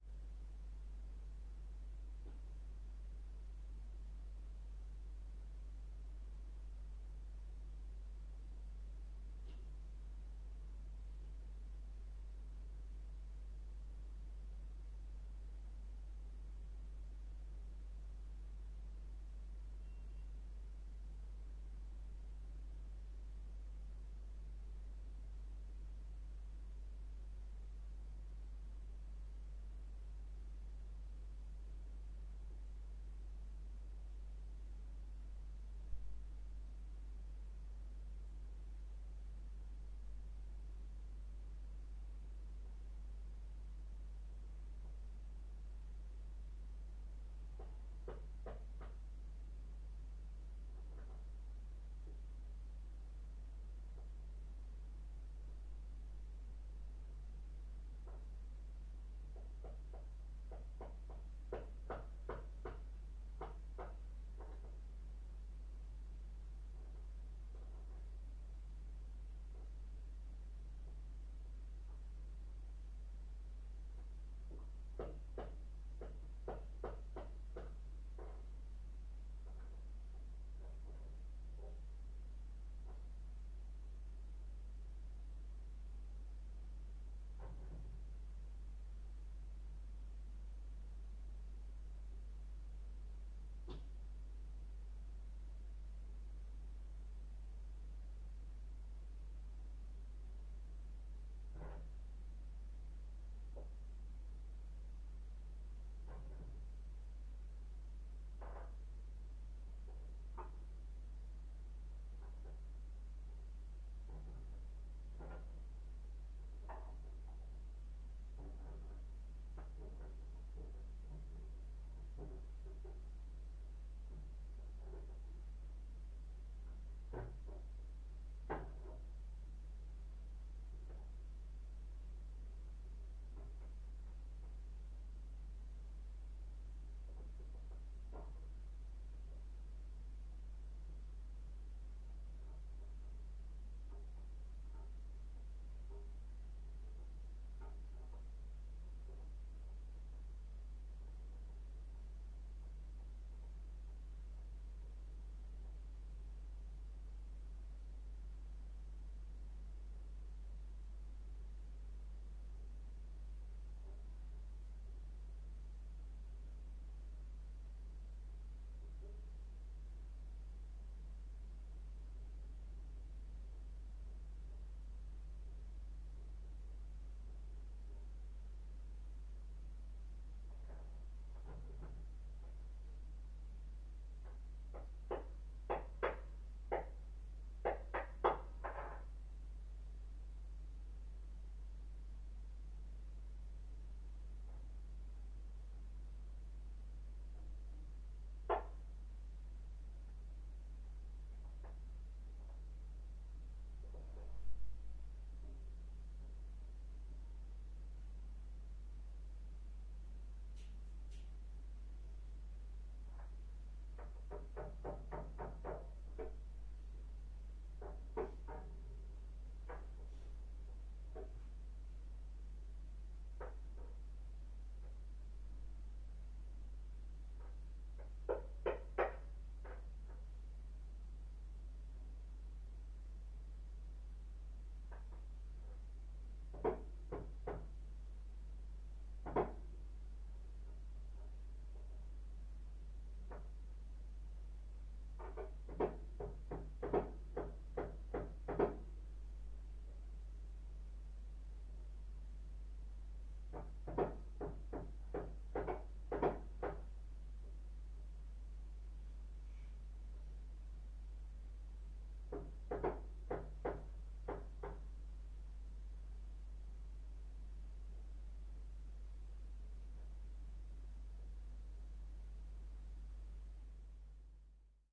repair neighbors hammer knock behind the wall
neighbors do repair the wall, hitting with a hammer
wall, neighbors, repair, knock, behind, hammer